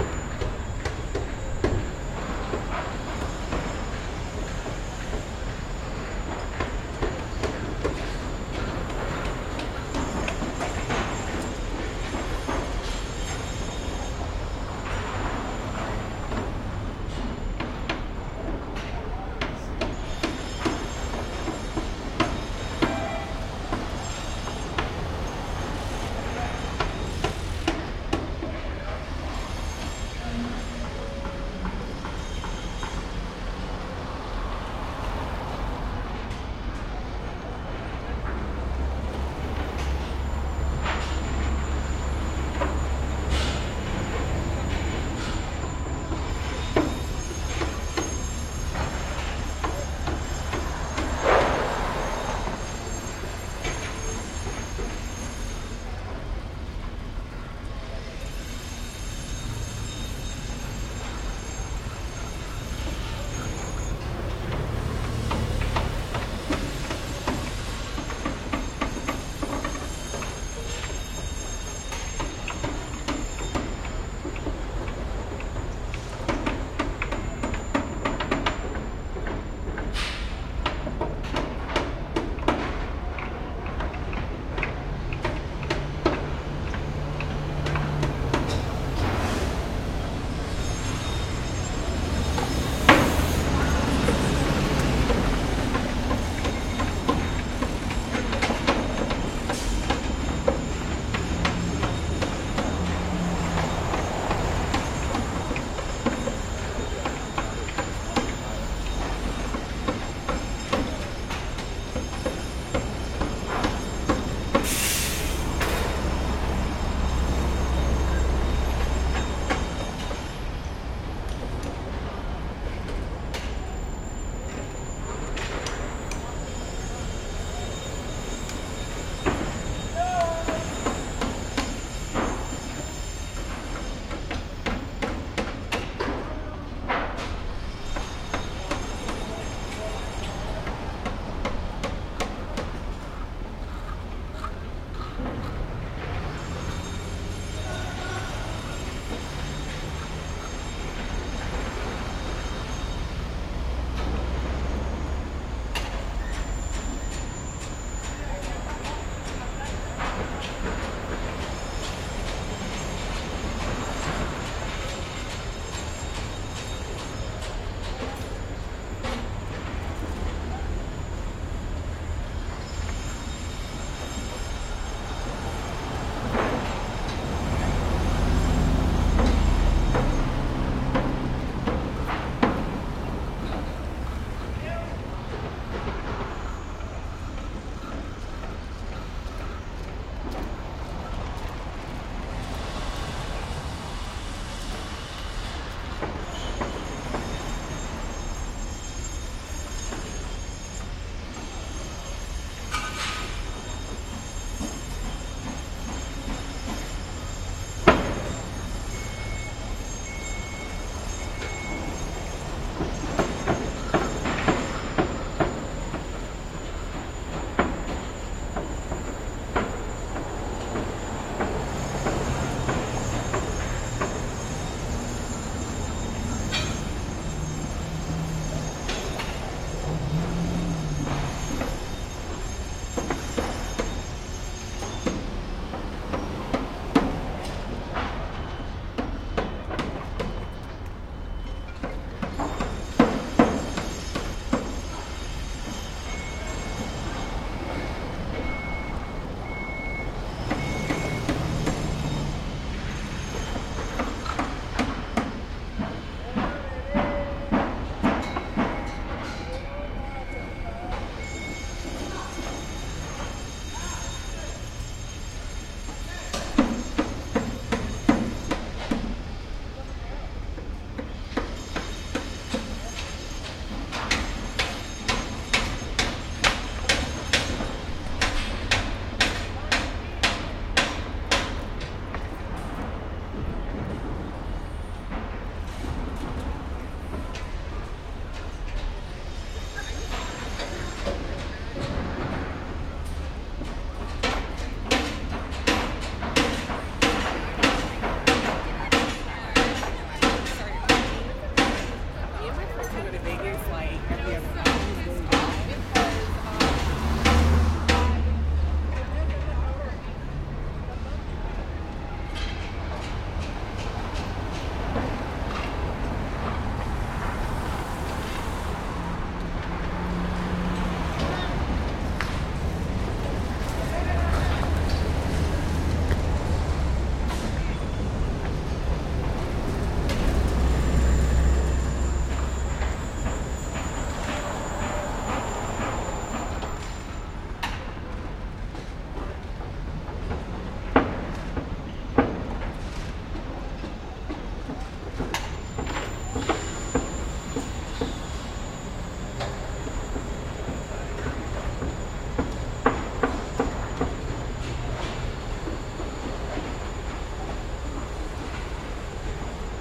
Downtown LA 11
One in a set of downtown los angeles recordings made with a Fostex FR2-LE and an AKG Perception 420.